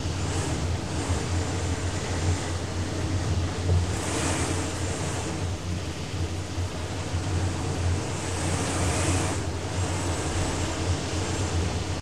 wind.loop
Wind recorded close to a window.